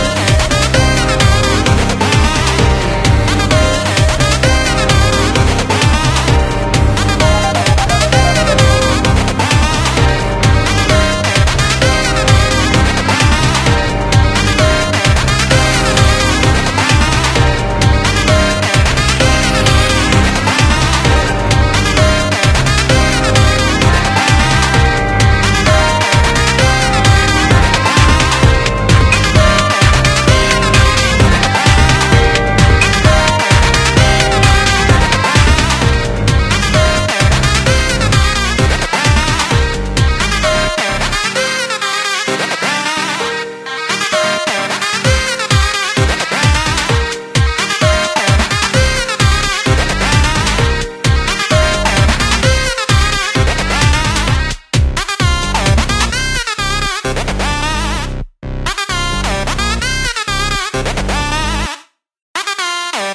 Beep-Boop
(beep boop) loop video game like tune. you can use this for whatever you wanna use it for!
enjoy
Made with splash